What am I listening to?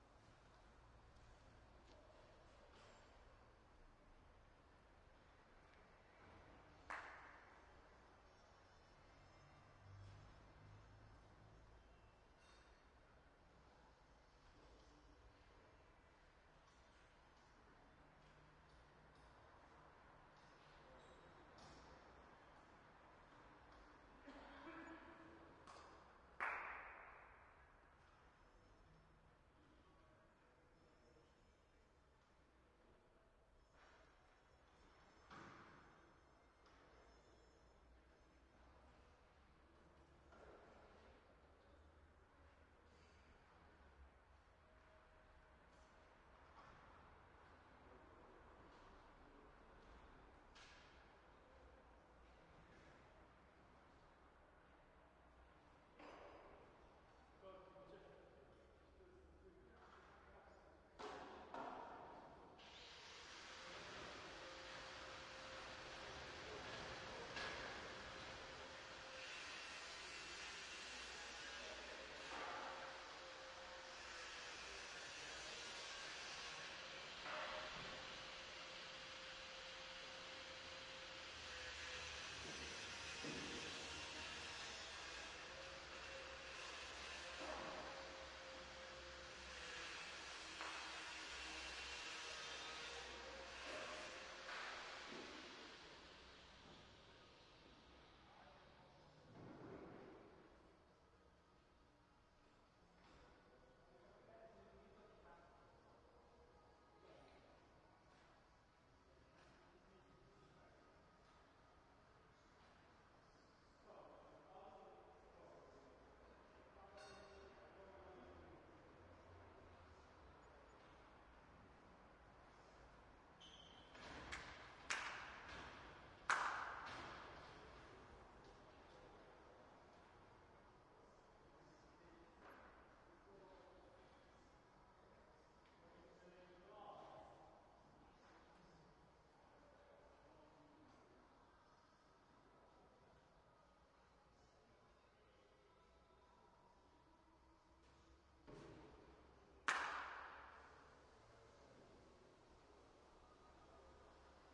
090613 00 sport building underconstruction
Inside big sport hall under contruction
building, sport